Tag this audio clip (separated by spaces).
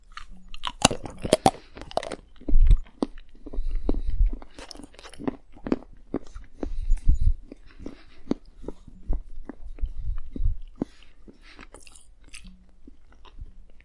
eating-chocolate eating chocolate